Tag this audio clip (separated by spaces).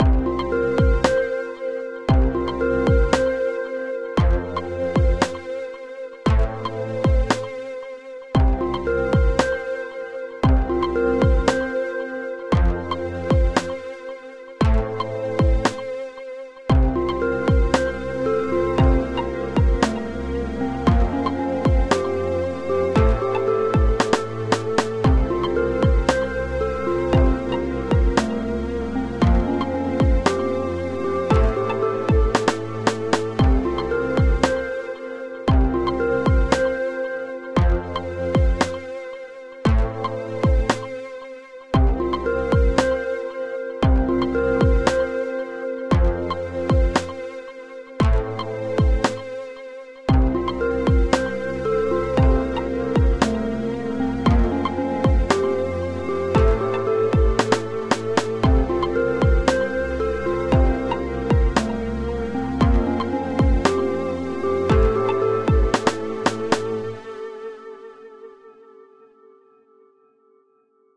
movie ambience jingle loop instrumental